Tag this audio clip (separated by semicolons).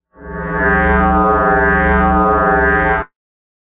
field; magnetic; magnetic-field; scifi; synth; vintage